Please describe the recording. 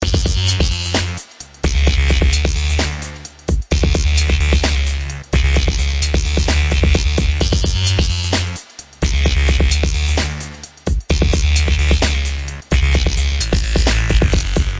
now beat synth 4
These sounds are from a new pack ive started of tracks i've worked on in 2015.
From dubstep to electro swing, full sounds or just synths and beats alone.
Have fun,
Bass, beat, Dance, Dj-Xin, Drum, Drums, EDM, Electro-funk, House, loop, Minimal, Sample, swing, Synth, Techno, Trippy, Xin